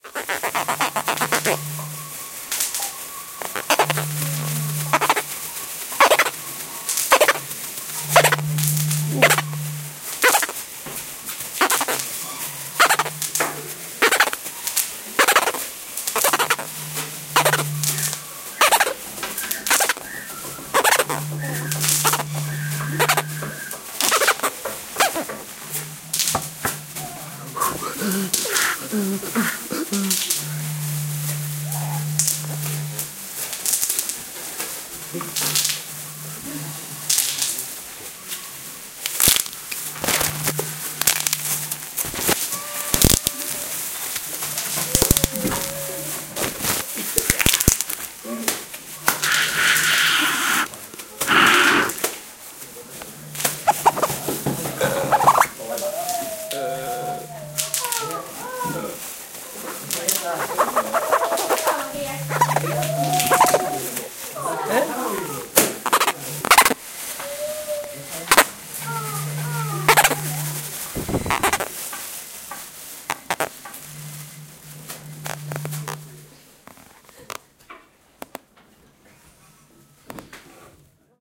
This sound has been produced with different objects like tubes, bottles and plastics to imitate the sound of the wind that we have in our region: the Tramuntana wind. We are inspired by the picture "Noia a la Finestra" by Salvador Dalí, so we produce also the sound of the sea, the crunch of the boats and the windows, etc. This is the recording from one specific corner of the class. There are some more, so we can have as a result of this pack, a multi-focal recording of this imaginary soundscape. We recorded it in the context of a workshop in the Institut of Vilafant, with the group of 3rEso C.
3rESO-C, Institut-Vilafant, crunch, sea, seagulls, tramuntana, wind, workshop